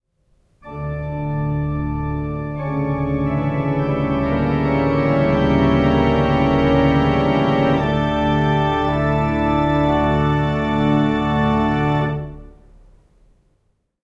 Dramatic Organ, B
A small snippet of Bach's "Toccata and Fugue in D Minor" which I played on the church organ at All Saints, Witley, England. Classically used in horror movies, this piece pretty much became synonymous with Halloween and Dracula.
An example of how you might credit is by putting this in the description/credits:
The sound was recorded using a "H1 Zoom recorder" on 5th September 2017.
Halloween, Spooky, Dracula, Scary, Organ, Dramatic, Pipe, Church